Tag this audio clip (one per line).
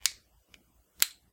Button
Flashlight
Off
On
Plastic
Switch